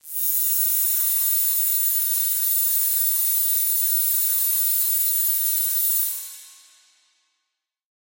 SteamPipe 1 Mechanical E5
This sample is part of the "SteamPipe Multisample 1 Mechanical" sample
pack. It is a multisample to import into your favourite samples. The
sample is a sound that in the lower frequencies could be coming from
some kind of a machine. In the higher frequencies, the sound deviates
more and more from the industrial character and becomes thinner. In the
sample pack there are 16 samples evenly spread across 5 octaves (C1
till C6). The note in the sample name (C, E or G#) does not indicate
the pitch of the sound but the key on my keyboard. The sound was
created with the SteamPipe V3 ensemble from the user library of Reaktor. After that normalising and fades were applied within Cubase SX & Wavelab.